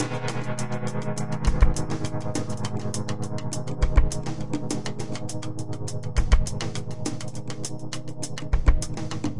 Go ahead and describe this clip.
Drumloop with gong
nice percussion rhythm (though somewhat static) and a gong